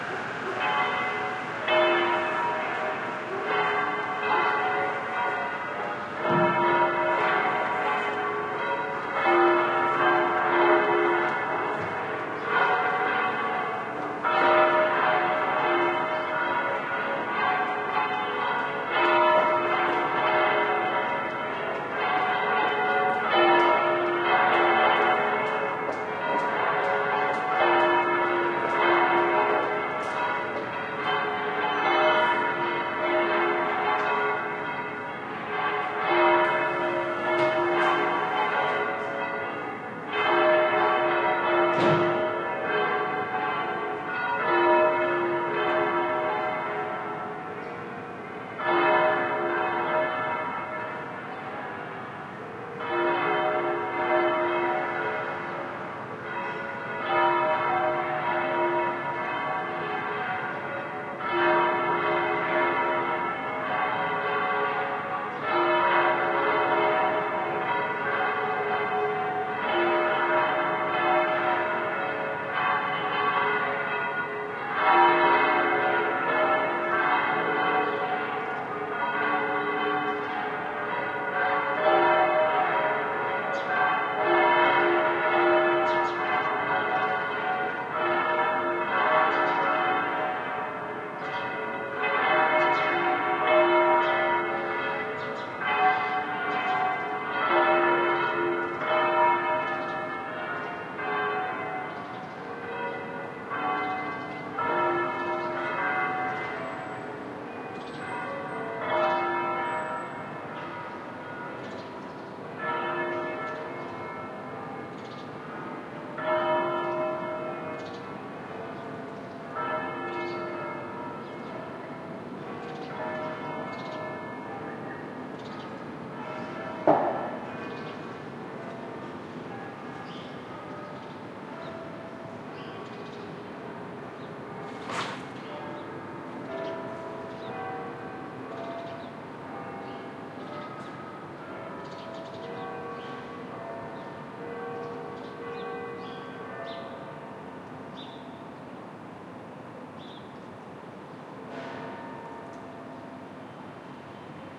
Seville's cathedral bells fading out. The sound was recorded one km away from source as reflected by a high wall, changes in volume are caused by changes in wind direction.